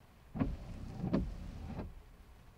windshield wipers wiping.
wipers, windshield